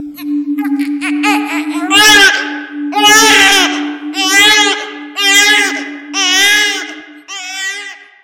lugnie charlotte 2016 2017 AliensAndBaby
To create this sound I use only with sounds effect on Audacity. With I repeated it 3 times. I changed the amplification. I increased the speed. I added an opening bottom and a closing bottom. To finish I modify the bass and treble.